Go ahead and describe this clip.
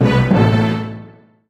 Stereotypical drama sounds. THE classic two are Dramatic_1 and Dramatic_2 in this series.
cinematic, drama, dramatic, movie, suspense